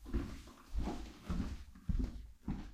Soft steps on wood floor.